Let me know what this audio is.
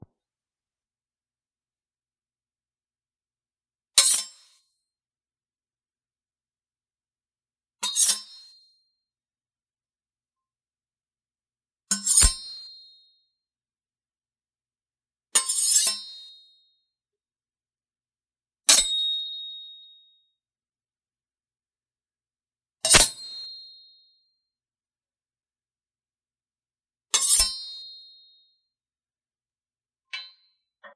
Sword Sounds 01
Scraping two kitchen knives together to get that classic "shing" sound. Recorded on iPhone 6S and cleaned up in Adobe Audition.
metal, unsheath, sword, sheath, knife, blade, shing